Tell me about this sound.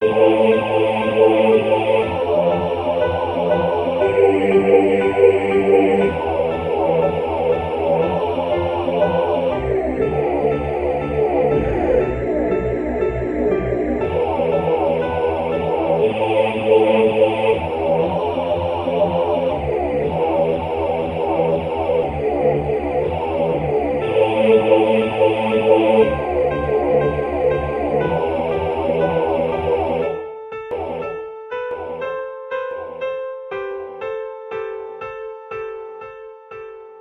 4 beats per measure bass voice with piano combo. Might be useful as music intro. Created with MU. Gently edited in Audacity.
loop, beat